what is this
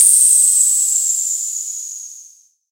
From the Hi Hat Channel of the Vermona DRM 1 Analog Drum Synthesizer